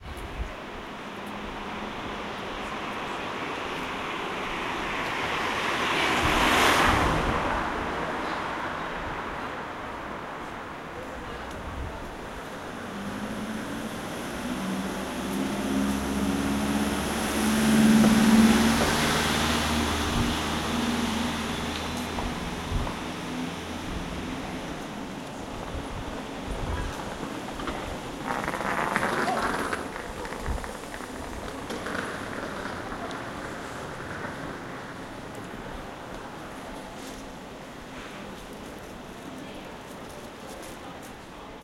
0403181100 due camion e un trolley
18 mar 2004 11:00 - Walking in via del proconsolo (street in the centre of Florence, Italy).